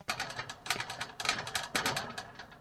Steps On Stairs
I cobbled some sound effects together to get the sound of footsteps on a rickety metal staircase for the third episode of Bloody Bold Irresolute. It can also work for bridges.